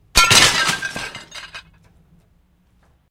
tiles smashing
this was a sound I made from various other sounds (thanks to wim and rebeat for those) for my animation, when a guy falls through the roof.
break, ceramic, crash, roof, smash, tiles